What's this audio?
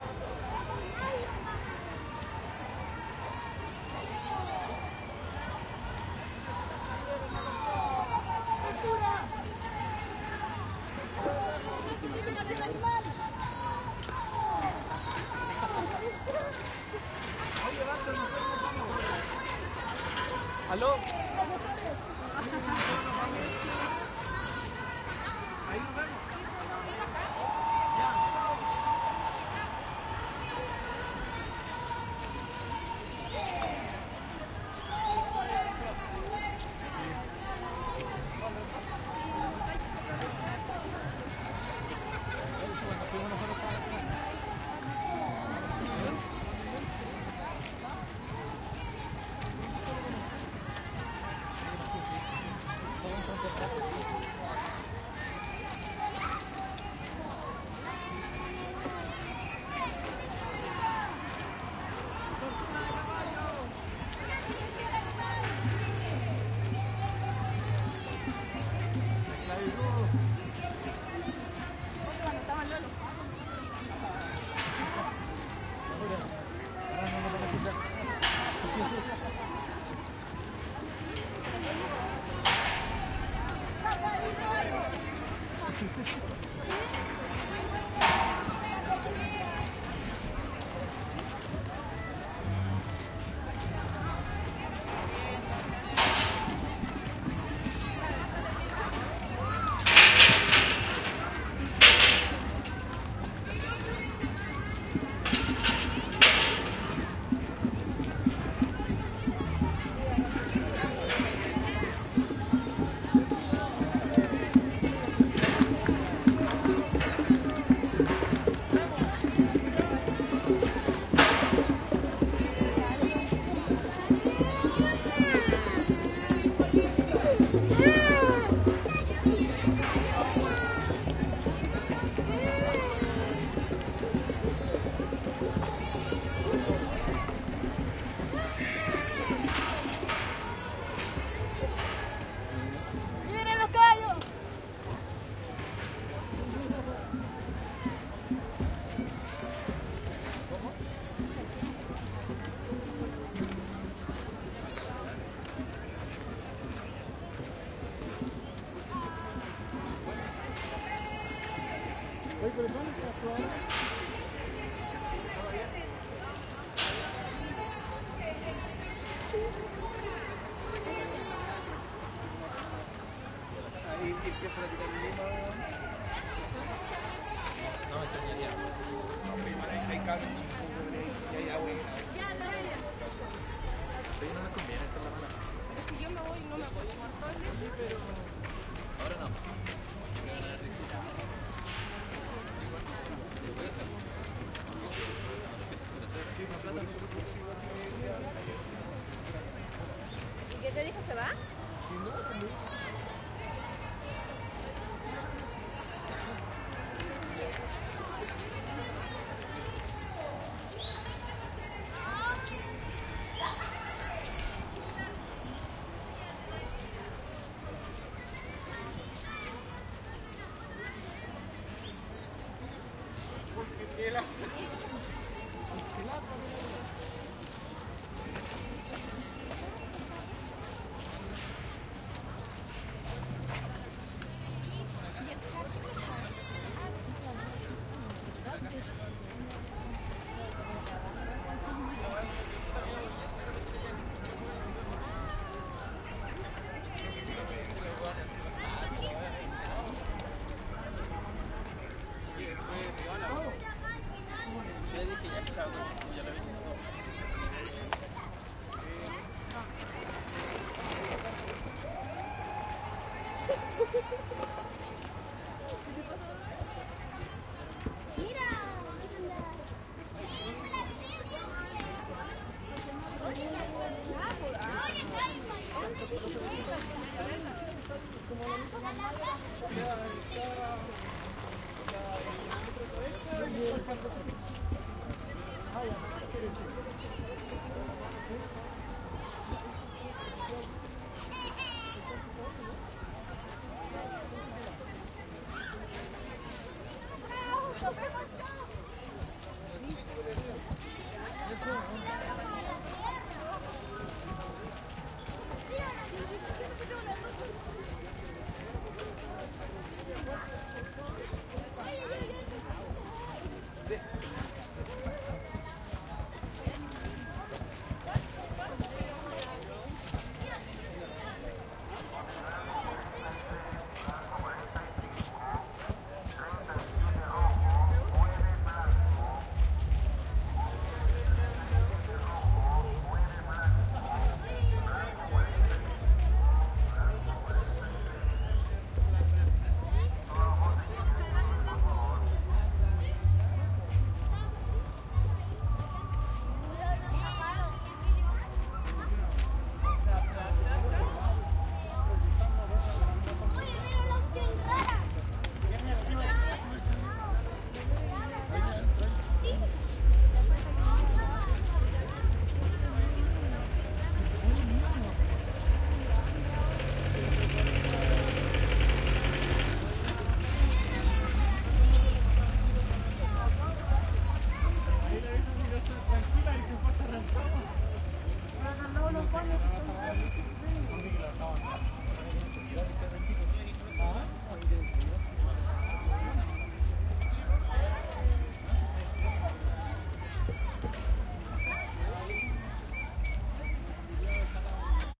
domingo familiar por la educacion 09 - saliendo del parque
saliendo del parque
desmantelando rejas
liberen a los caballos tortura animal
batucadas
caminando por arcilla
de fondo un bingo
chile, crowd, domingo, educacion, estudiantil, familiar, jail, movimiento, ohiggins, park, parque, publico, recital, rejas, santiago